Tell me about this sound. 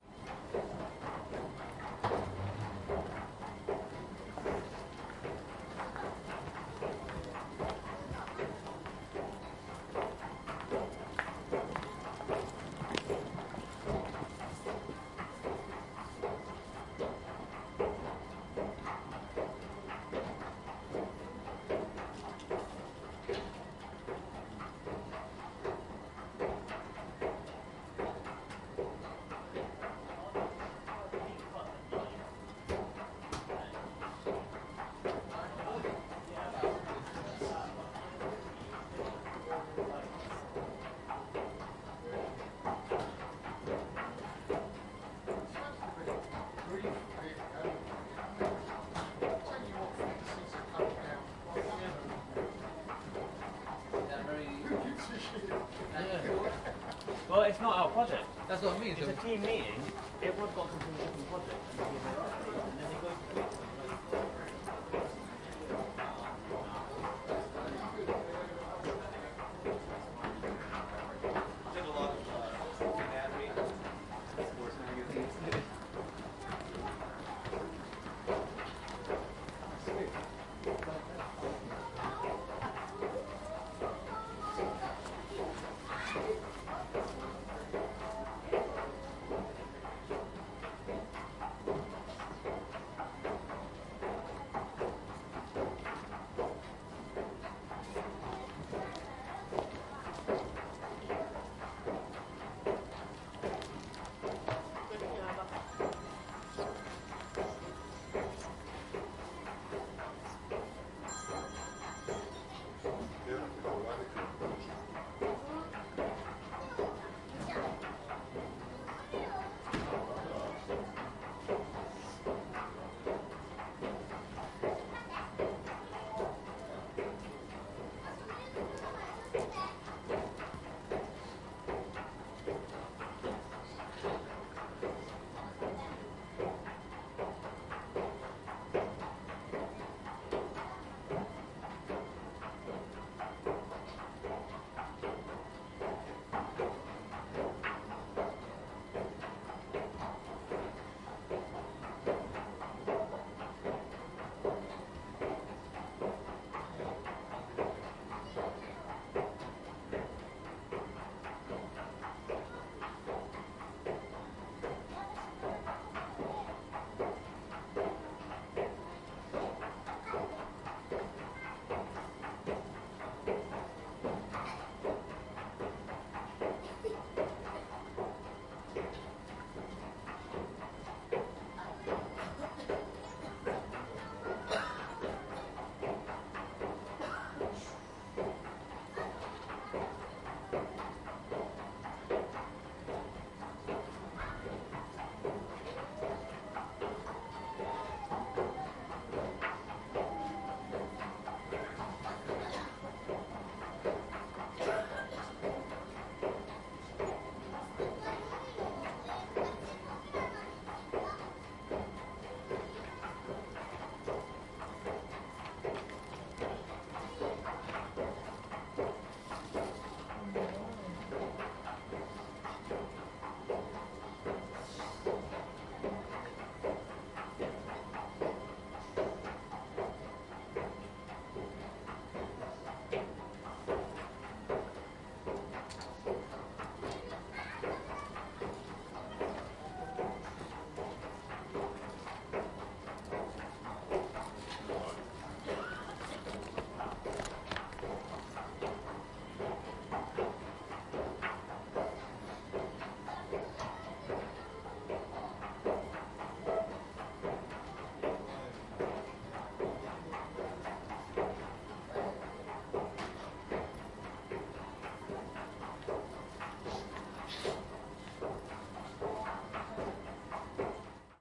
Ambience airport escalator
Noisy escalator next to a leisure zone in T4 of Heathrow airport (London). Recorded on April 26, 2016 with a Zoom H1 Handy Recorder.
ambience, rhythmic, machines